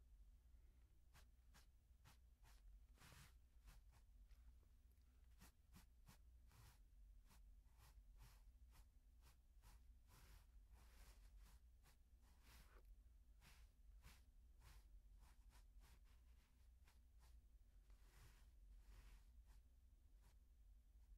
The light sounds of someone brushing off and dusting an object.
blow, brush, dust